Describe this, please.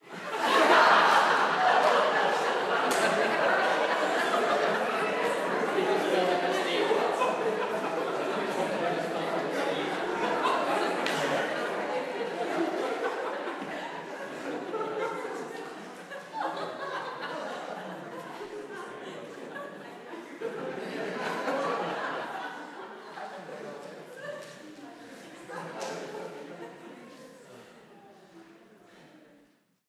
Crowd laugh for Long time
Crowd, laugh, laughter